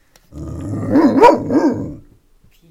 Woofing (don't know how to beter describe this sound) of my Golden Retriver. Recored with RODE lavalier microphone
woof, bark, golden, dog, animal, retriver, barking